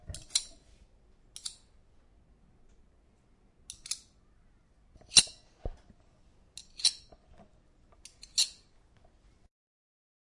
Epée qu'on dégaine
Sound of unseathing a sword made sliding a fork and a knife, made in class by students and recorded with a Zoom2 device.